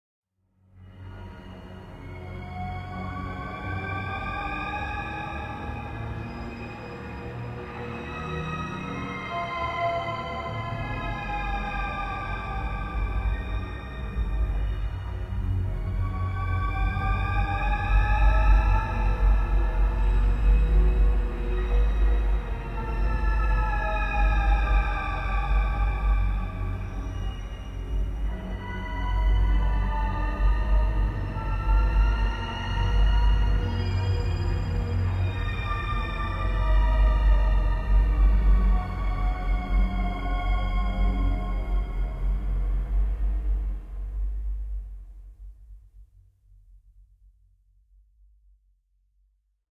50 seconds of ghostly wails over a dark sub bass drone with wide stereo reverb. Produced by using two old school analog synths, a CS-80 and a DX7. All chorus, phaser and reverb settings were manipulated within the synths. An external hi-pass EQ plugin was added in order to cut off the subs at 30Hz.
These old analog synths produce frequencies way below the tolerance of your monitors; thus the need for a bass cutoff, not to mention to also prevent involuntary bowel leakage XD.
Drone, Ambient, Horror, Synth, Dark, Short